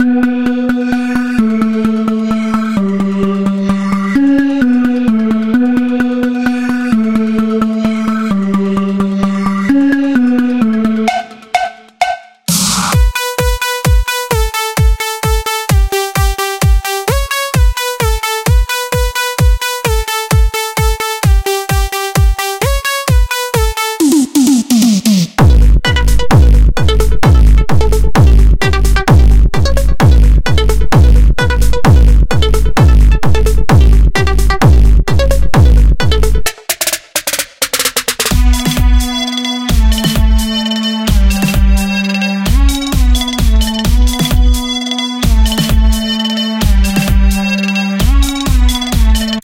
New Step Music
bgm, drop, edm, music, trance